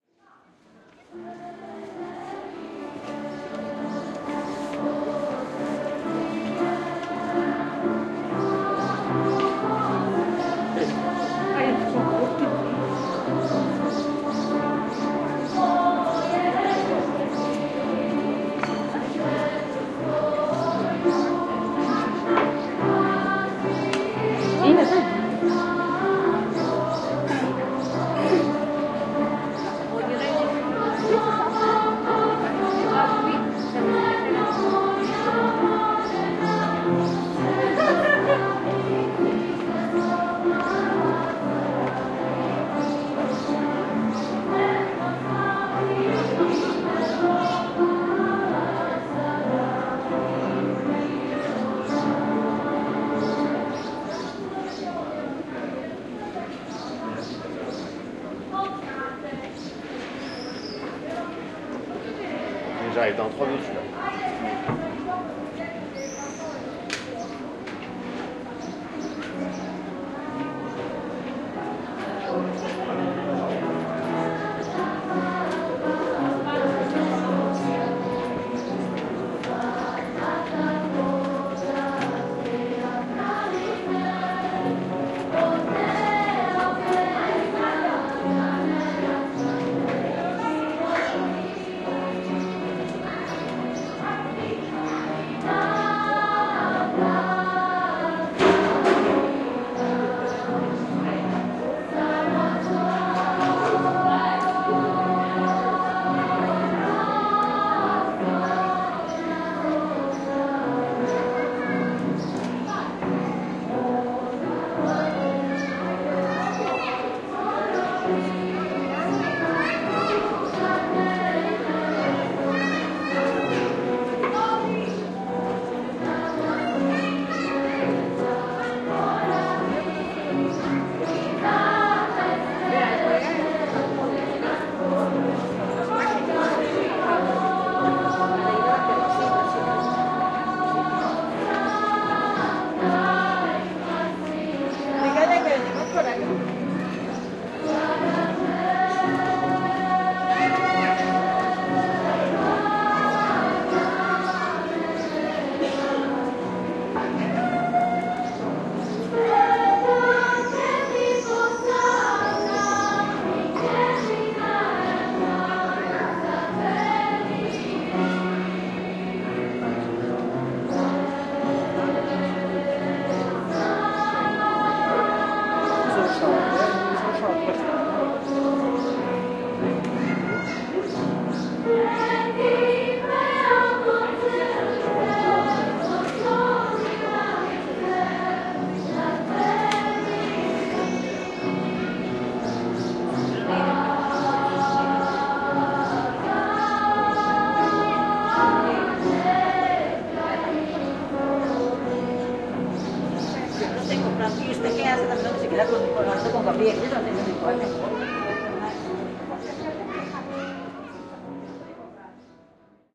through the window kotor 08.05.16
08.05.2016: street in Kotor in MOntenegro. Singing audible through the window.
Rekorder - marantz pmd661 mkii + shure vp88 (no processing).
ambience, fieldrecording, music, singing, song, street